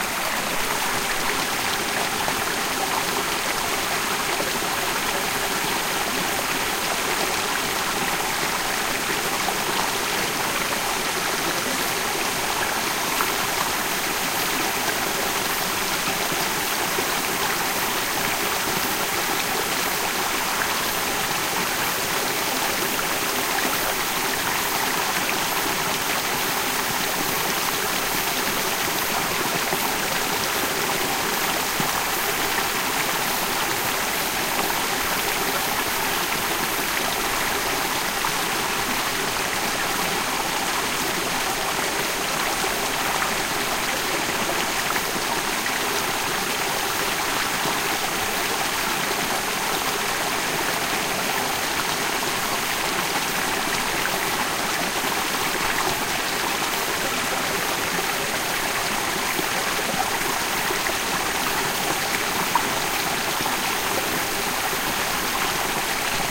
mountain stream
brook, field-recording, flow, flowing, mountain, river, stream, water